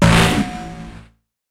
DrumPack002 Overblown Glitched Snare 02 (0.10 Velocity)
How were these noises made?
FL Studio 21
Track BPM: 160
Instruments: FPC
Drumset / Preset: Jayce Lewis Direct
Effects Channel:
• Effect 1: Gorgon
◦ Preset: Alumnium Octopus (Unchanged)
◦ Mix Level: 100%
• Effect 2: Kombinat_Dva
◦ Preset: Rage on the Kick (Unchanged)
◦ Mix Level: 43%
• Effect 3: Kombinat_Dva
◦ Preset: Loop Warmer (Unchanged)
◦ Mix Level: 85%
Master Channel:
• Effect 1: Maximus
◦ Preset: NY Compression (Unchanged)
◦ Mix Level: 100%
• Effect 2: Fruity Limiter
◦ Preset: Default (Unchanged)
◦ Mix Level: 100%
What is this?
A single 8th note hit of various drums and cymbals. I added a slew of effects to give a particular ringing tone that accompanies that blown-out speaker sound aesthetic that each sound has.
Additionally, I have recorded the notes at various velocities as well. These are indicated on the track name.
As always, I hope you enjoy this and I’d love to see anything that you may make with it.
Thank you,
Hew
Distorted, Distorted-Drum-Hit, Distorted-Drums, Distorted-One-Shot, Distorted-Single-Hit, Distorted-Snare, Distorted-Snare-Drum, Distorted-Snare-Drum-One-Shot, Distorted-Snare-Drum-Single-Hit, Distorted-Snare-One-Shot, Distorted-Snare-Single-Hit, One-Shot, Overblown-Snare, Overblown-Snare-Drum, Single-Hit, Snare, Snare-Drum, Snare-Drum-One-Shot, Snare-One-Shot